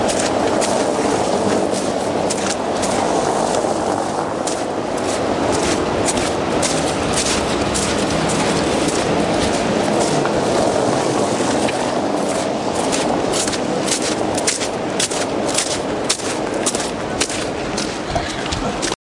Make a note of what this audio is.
chuze v ulici s frekventovanou dopravou
Walking along the street with busy traffic
noisy, street